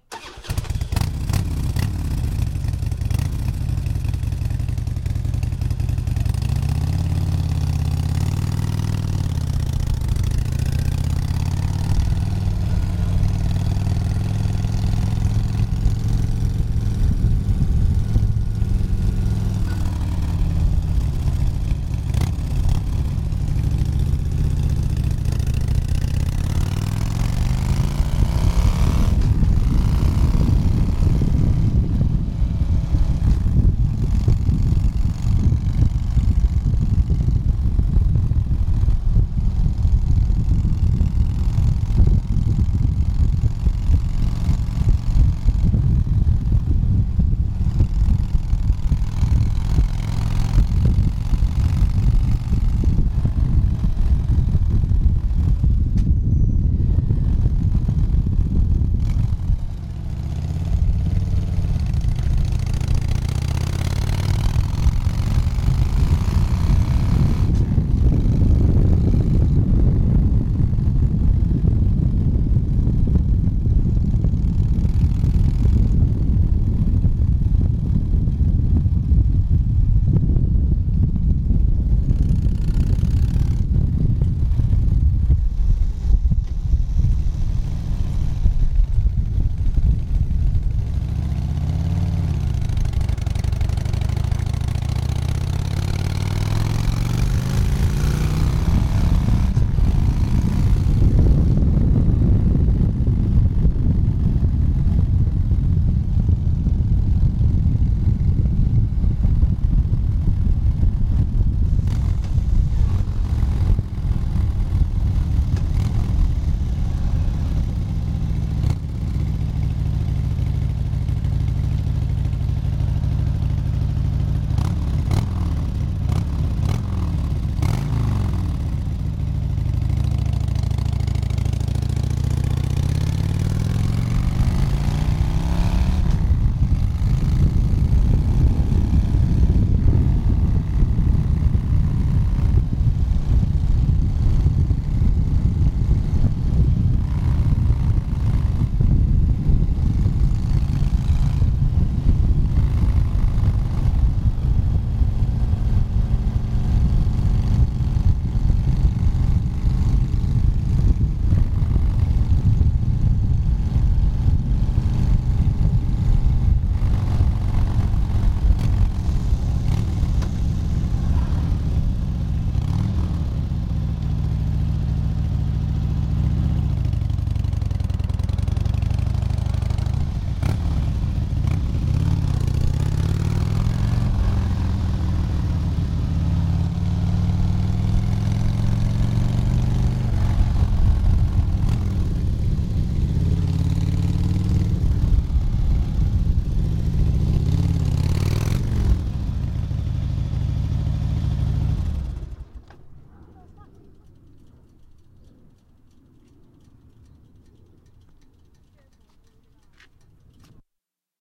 I recorded a ride on a Harley Davidson motorcyle ... IN MILWAUKEE!